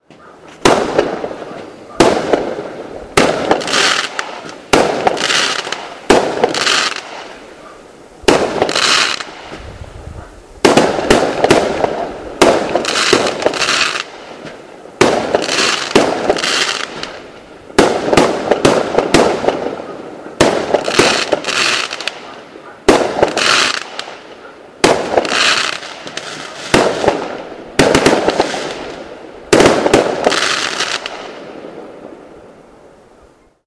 Raw audio of standard fireworks that leave a crackling sound.
An example of how you might credit is by putting this in the description/credits: